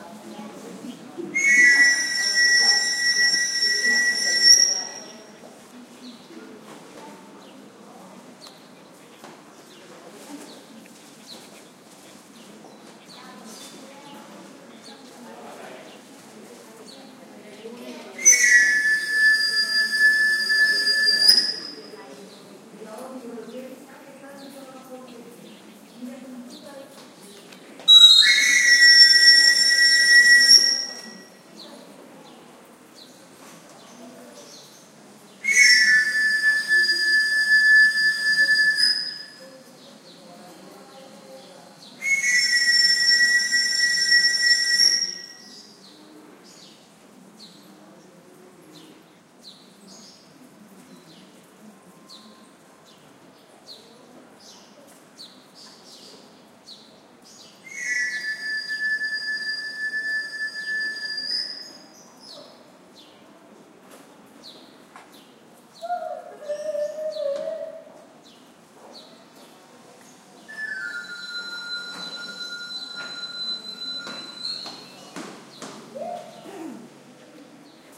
the characteristic whistle of a wandering knife sharpener passing along my neighbourhood in oldtown Seville, soft voices of people in background. This whistling sound used to be common in my childhood, nowadays the trade of sharpening knives is quite rare in south Spain so this sample is kind of anthropological. The Son* PCM M10 internal mics - and the limiter! - did a good job here giving to the sample a nice dynamics
seville, ambiance, spain, whistle, south-spain, oldtown, city, field-recording